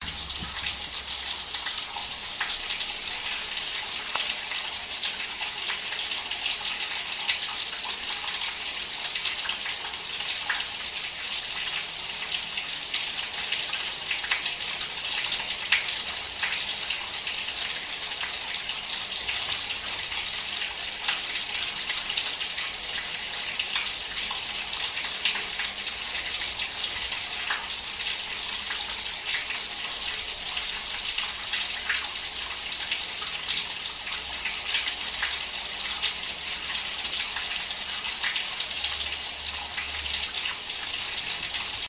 Sewer Drain
Recorded using portable digital recorder